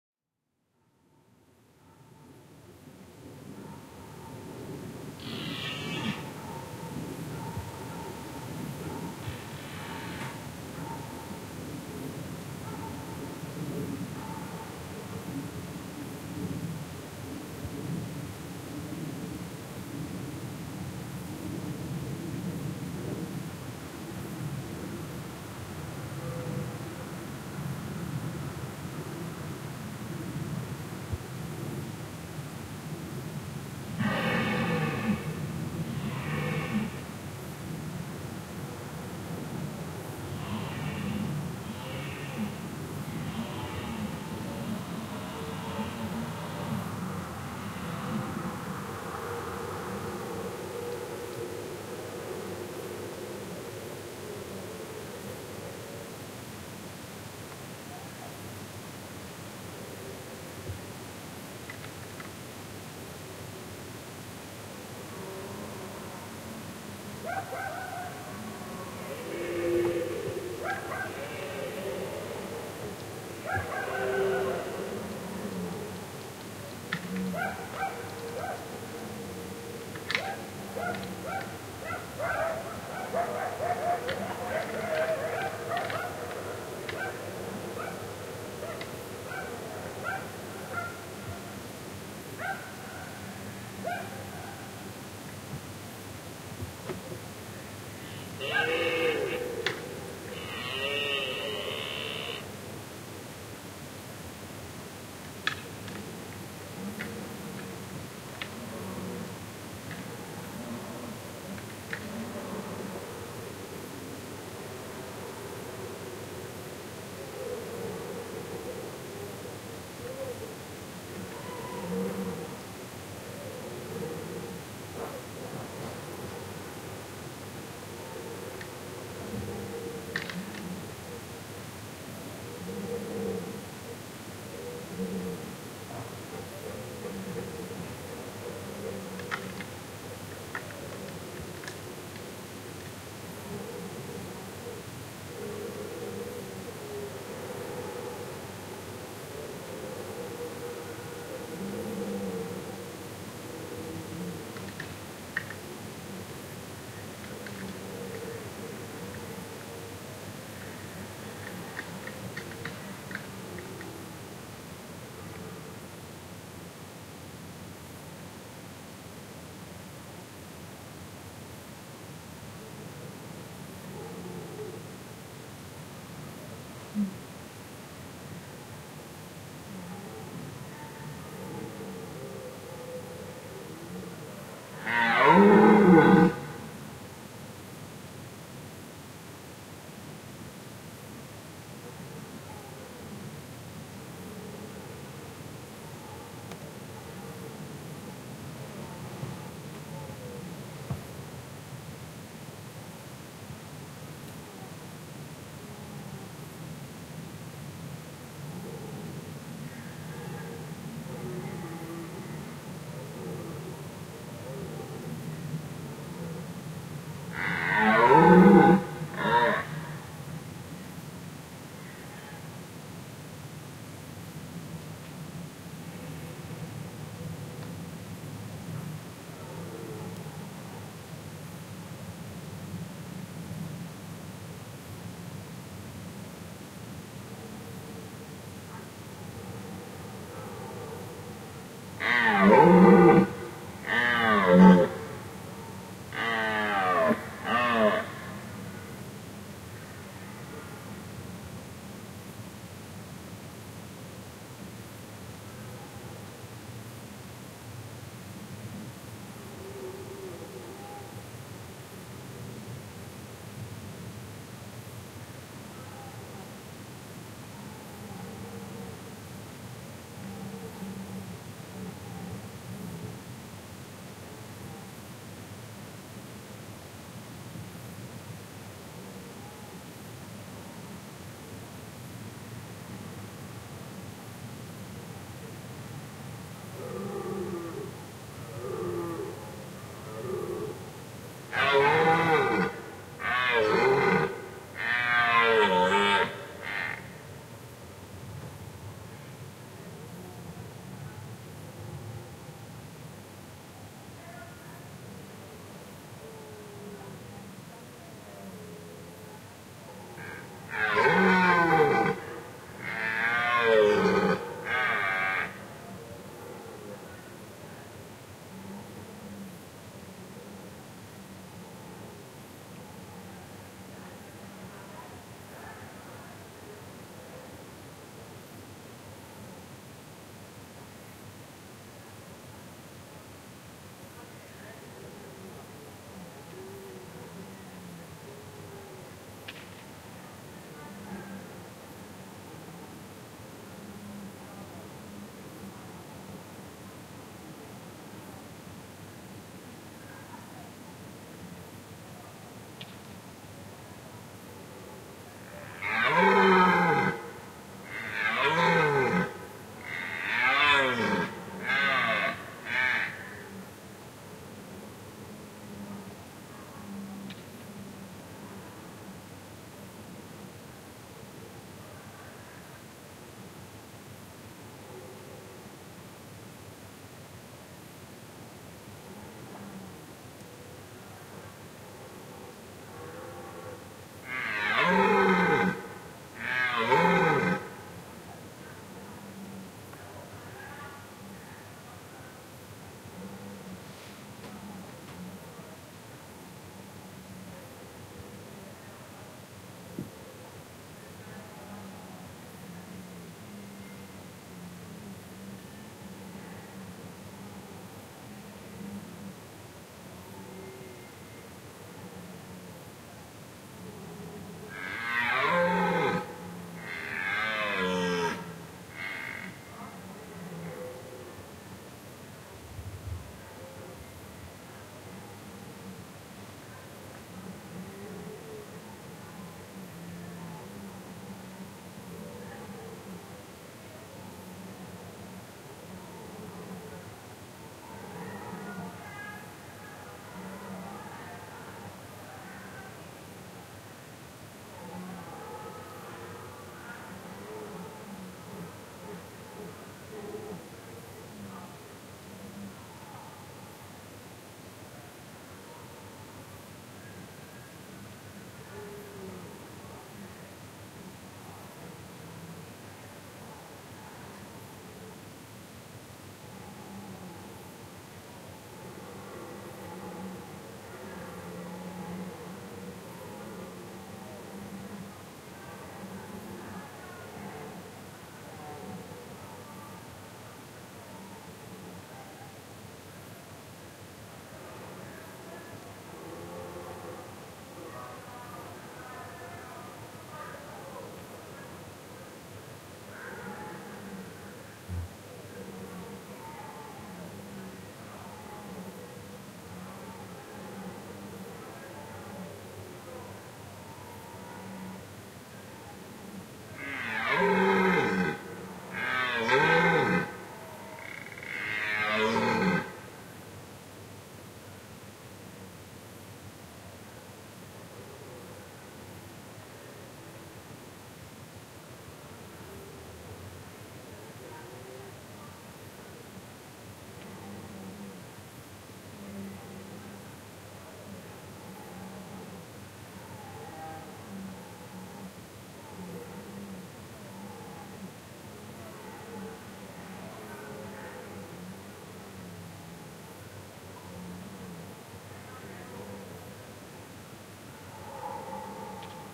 Czech Bohemia Deer Distant
Deer calling and rutting in Czech Republic Bohemia area
Distant
creepy, animal, nature, countryside, deer, gutteral, field-recording